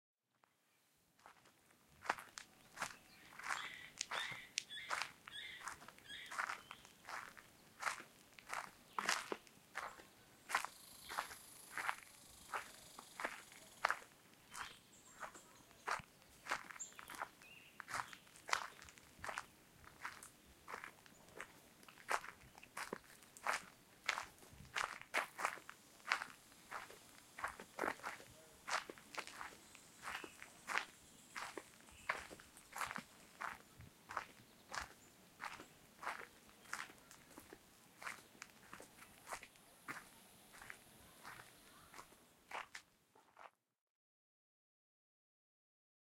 Footsteps in Forest
Footsteps in a forest in Canada
Zoom H4N Pro
nature, forest, leaves, walking, woods, footsteps, walk, wood, field-recording, saltwells